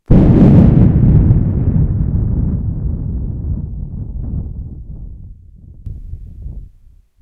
Quite realistic thunder sounds. I've recorded this by blowing into the microphone.
Lightning, Loud, Storm, Thunder, Thunderstorm, Weather